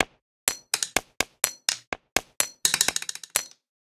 WoodenBeat 125bpm02 LoopCache AbstractPercussion
Abstract Percussion Loop made from field recorded found sounds